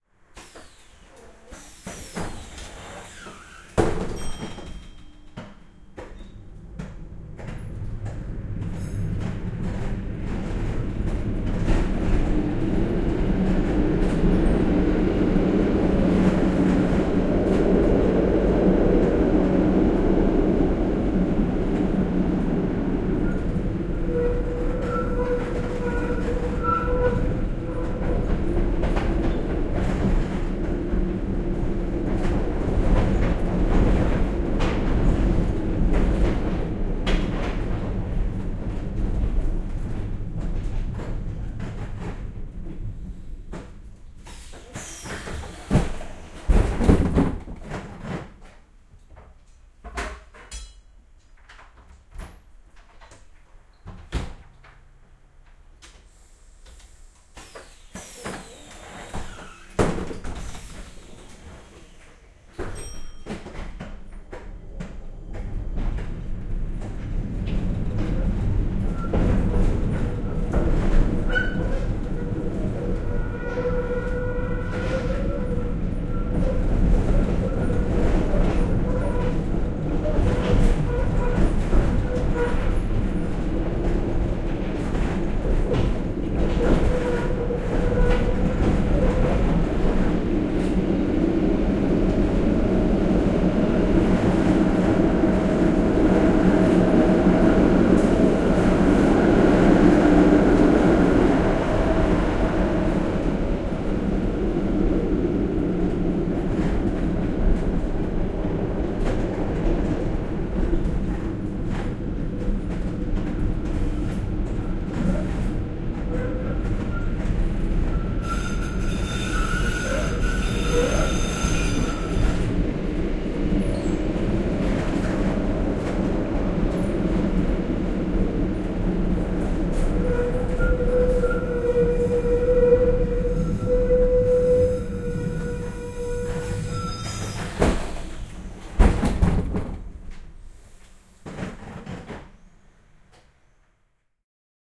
Raitiovaunu, ajoa, vanha / An old rattling tram, a 1959 model, empty, doors, bell, start off, driving, stopping, 2x, interior
Vanha, koliseva raitiovaunu, vm 1959, laihialainen. Ovet kiinni, kello kilahtaa, lähtö ja ajoa mukana, kiskot ulvovat, pysähdys pysäkille, kellon kilahdus, ovet auki ja kiinni. 2 x. Äänitetty tyhjän vaunun keskiosassa.
Paikka/Place: Suomi / Finland / Helsinki
Aika/Date: 03.08.1991
City, Field-Recording, Finland, Finnish-Broadcasting-Company, Joukkoliikenne, Kaupunki, Public-Transport, Raideliikenne, Rail-Traffic, Raitiovaunu, Soundfx, Suomi, Tehosteet, Tram, Yle, Yleisradio